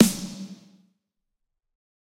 Snare Of God Wet 023
drum, drumset, kit, pack, realistic, set, snare